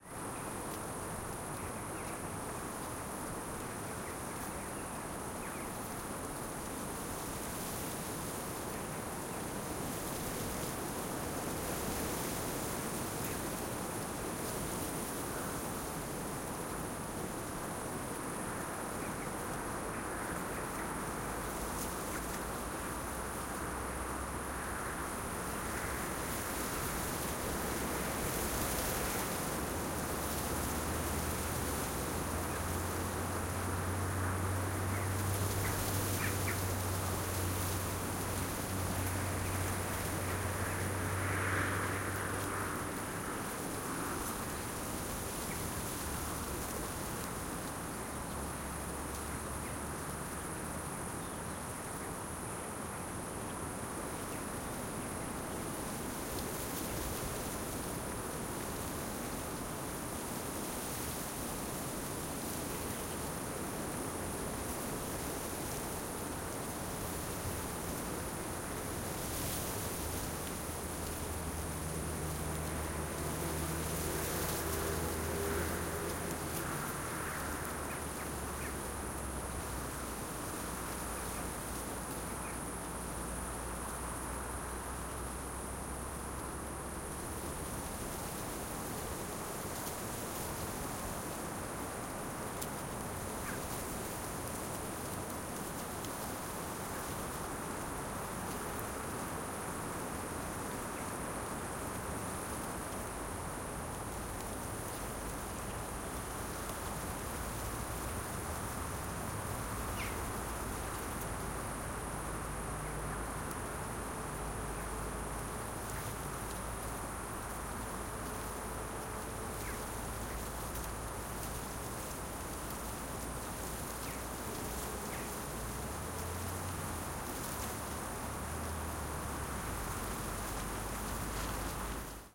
Medium perspective of dry grass moving in "mistral" wind. some crickets, some swifts, some distant traffic sometimes.From various field recordings during a shooting in France, Aubagne near Marseille. We call "Mistral" this typical strong wind blowing in this area. Hot in summer, it's really cold in winter.
aubagne, crickets, france, grass, insects, mistral, swifts, wind